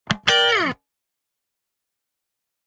Funky Electric Guitar Sample 14 - 90 BPM
Recorded using a Gibson Les Paul with P90 pickups into Ableton with minor processing.